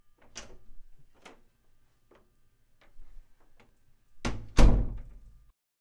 open and close door
opening and closing door
close, door, open